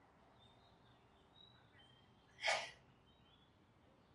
sound - sneeze - homemade
I sneezed! It's fake, though...
sneeze, cold, ill, allergy, allergies, sick, allergic